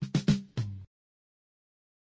Old school Drum Fill
A drum fill from beaterator
school, old, Beaterator, drum, fill